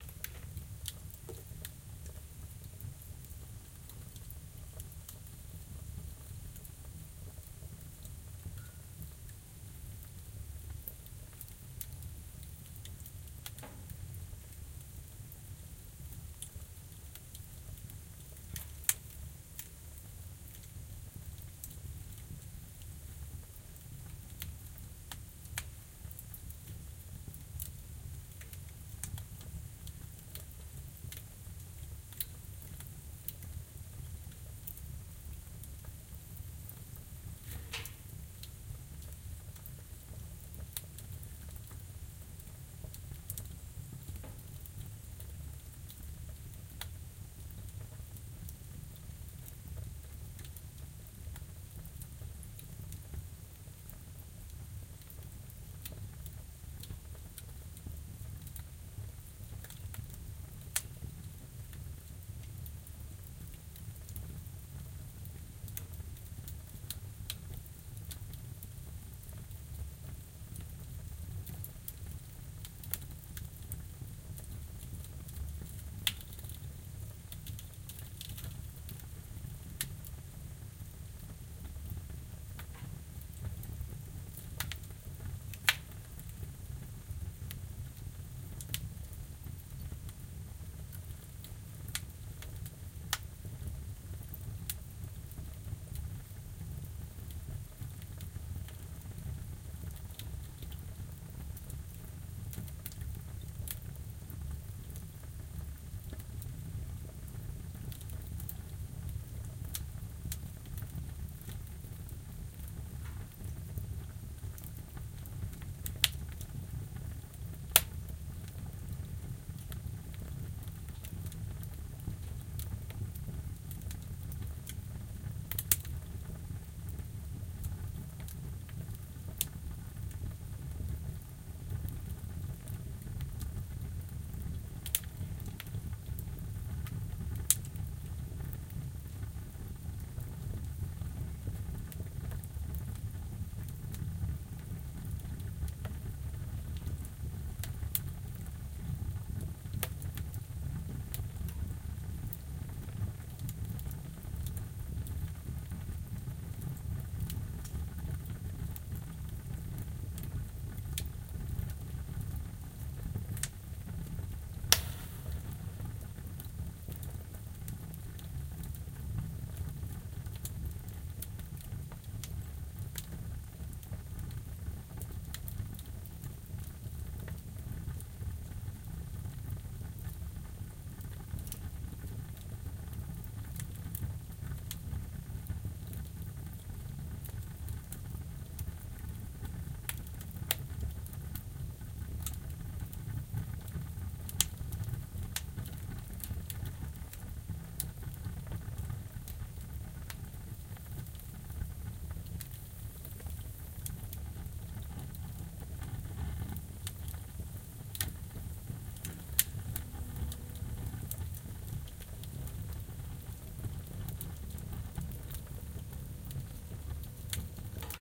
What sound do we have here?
Drakensberge wood fired oven
Wood fire in a steel oven during winter (July) in a Lodge (2000m high)at the foot of the Drakensberge in South Africa. Marantz PMD751, Vivanco EM35.
cracking, fireplace, fire, flame, chimney, burning, home, oven